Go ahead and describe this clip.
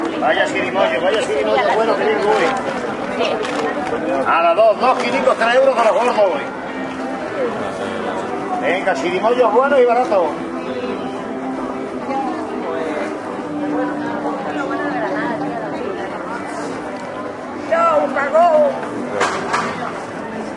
market ambiance with voices talking in Spanish. Recorded at Plaza de la Pescadería, Granada, Spain with Shure WL183 pair into Olympus LS10 recorder